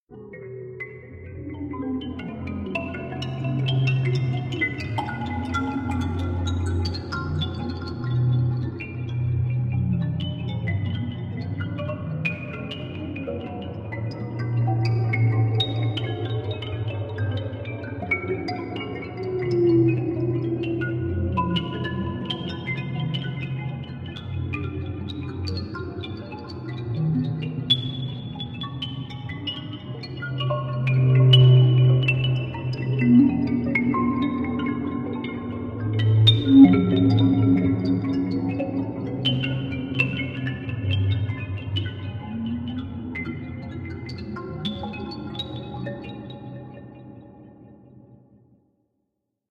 various reaktor tone generators, FM generators, filters, until it all folds to this... whatever it is.
synth, blieb, artificial, digital, space, athmosphere, reaktor